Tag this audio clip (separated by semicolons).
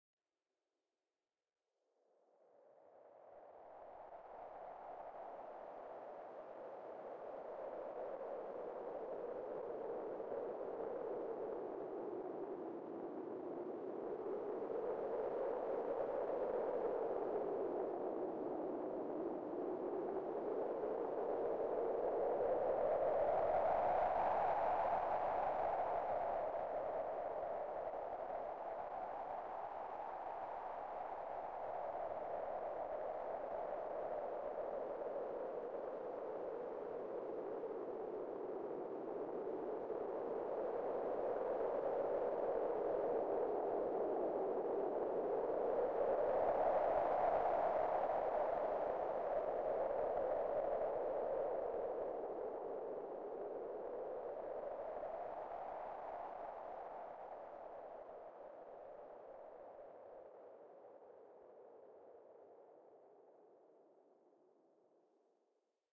Autumn; Breezy; Day; Natural; Nature; Sounds; Stormy; Whirling; Whooshing; Wind; Windy